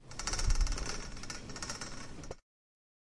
Sound of a cleaning cart while its wheels are rolling. It has been recorded with the Zoom Handy Recorder H2 very closely to the sound source. It has been recorded in the hall of the Tallers building in the Pompeu Fabra University, Barcelona. Edited with Audacity by ading a fade-in and a fade-out.
campus-upf, cleaningcart, corridor, hall, tallers, university, UPF-CS14, wheels, zoomH2handy